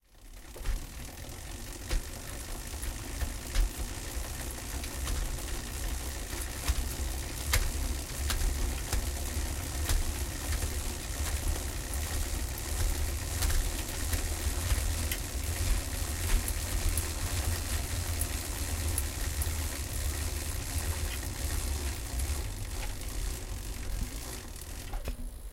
chain-bike-wheel
Driving a chain of a bike and wheel